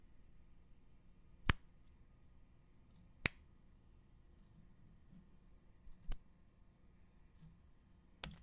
hand taps
one hand patting another